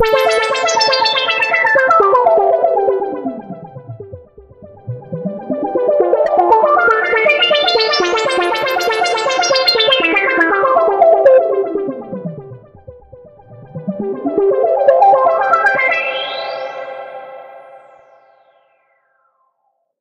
ARP C - var 9
ARPS C - I took a self created sound from Gladiator VSTi within Cubase 5, played some chords on a track and used the build in arpeggiator of Cubase 5 to create a nice arpeggio. Finally I did send the signal through several NI Reaktor effects to polish the sound even further. 8 bar loop with an added 9th and 10th bar for the tail at 4/4 120 BPM. Enjoy!
120bpm, arpeggio, harmonic, melodic, sequence, synth